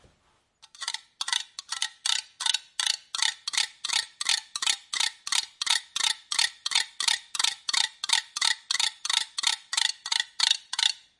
A set of rhythms created using kitchen implements. They are all unprocessed, and some are more regular than other. I made these as the raw material for a video soundtrack and thought other people might find them useful too.